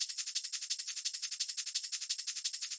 Doubletime distorted 172
A common shaker moovement with modulated hihats, ideal for speed up your beat.
The shakers perform a swing while the hih hitin all 1/8-s.